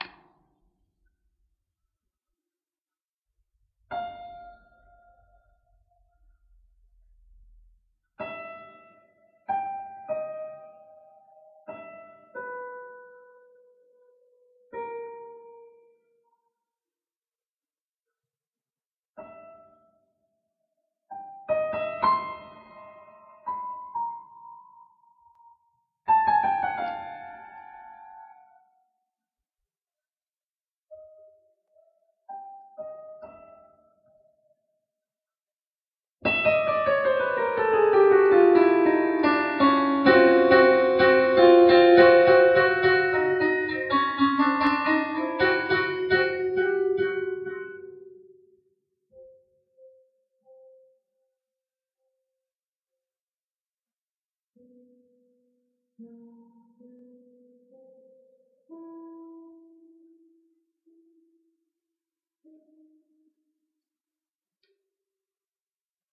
who plays on the piano?
Scary piano-sound. I've recorded this sound on my digital paino "Roland F-120" whit a studio-mic. Enjoy!
F-120, creepy, ghost, horror, music, piano, play, plays, roland, scary